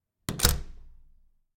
apartment door slam
The sound of a slammed apartment door.
Recorded with the Fostex FR-2LE and the Rode NTG-3.
apartment, door, Fostex, FR-2LE, NTG-3, Rode, slam